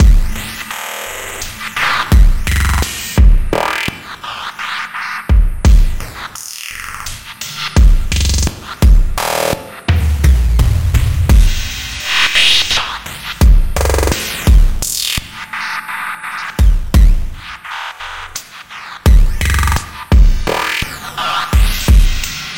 Glitch Drum loop 9g - 8 bars 85 bpm
Loop without tail so you can loop it and cut as much as you want.